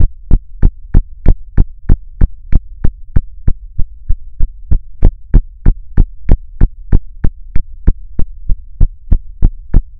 To create this sound I use only with sounds effect on Audacity. With I cut the sound, I repeated it 3 times I changed the sound amplification. I increased the bass and lowered the treble. Finally I add a rhythm track.